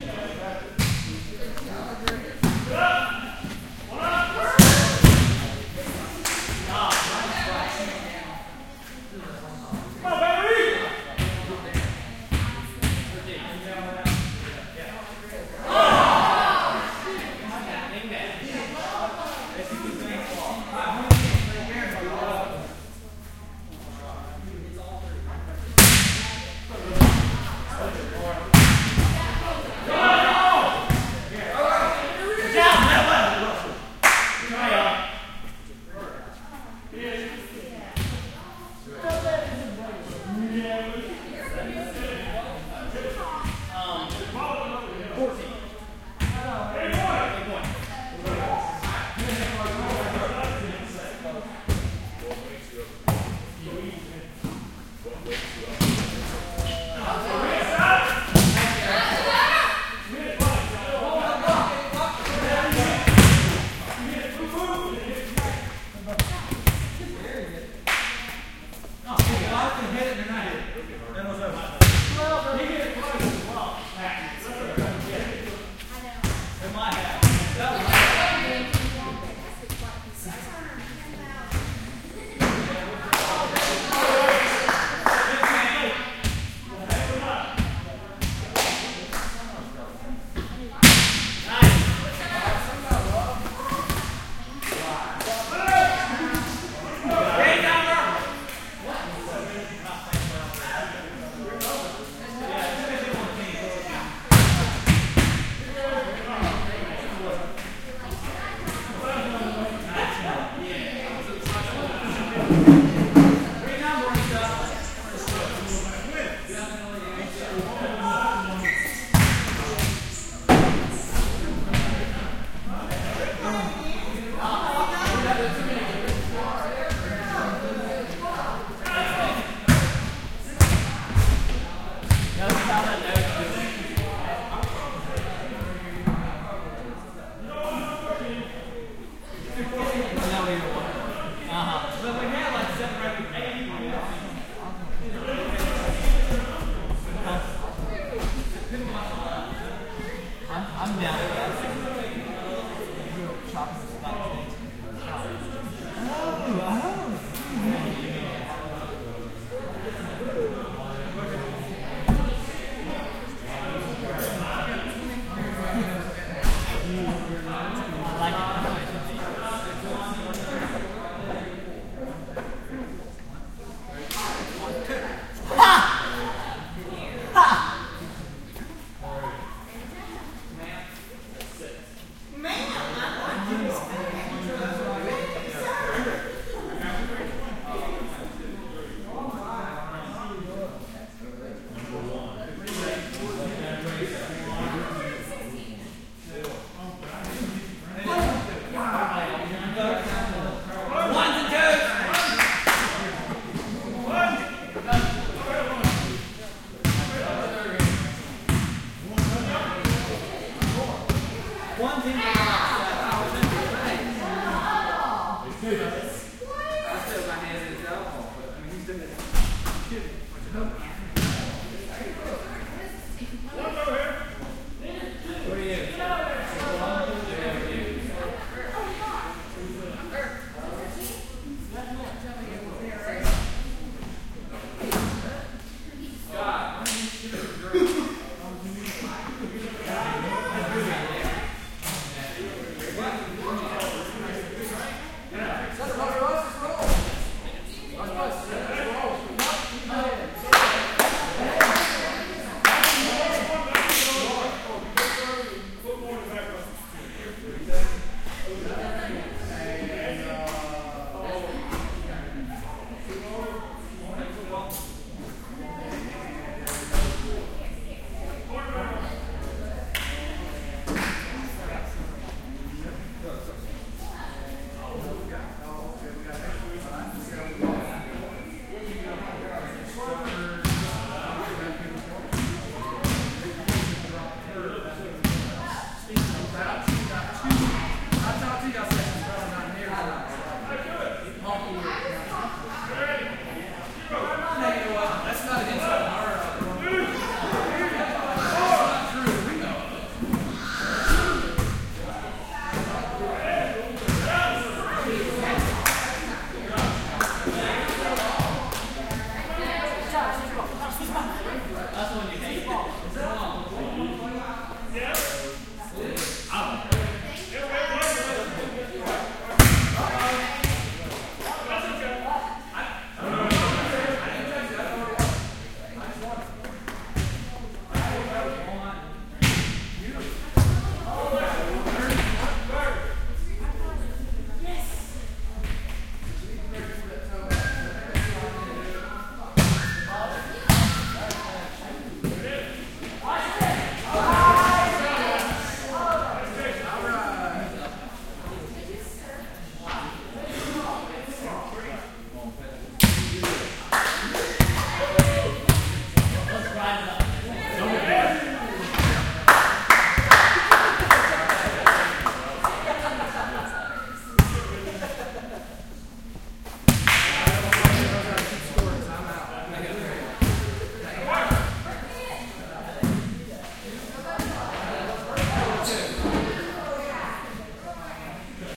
Volleyball Game
Game, Volleyball, Ball, noise, hit, being